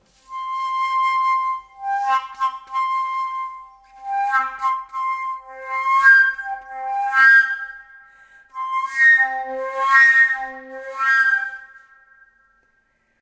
Flute Play C - 13
Recording of a Flute improvising with the note C
Acoustic, Flute, Instruments